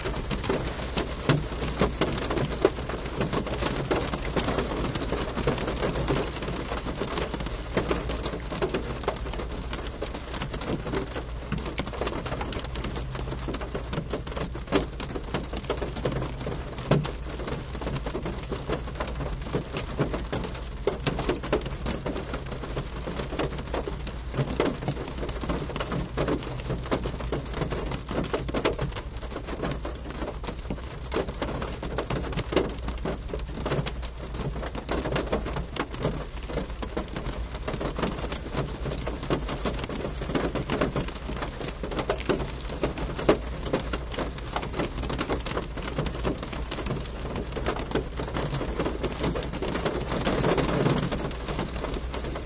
rain on car roof
Recording from inside a parked car of rain falling on the roof. The car
was parked under a large tree resulting in very large drops hitting the
roof & creating loud impacts with a slight metal resonance from the
roof.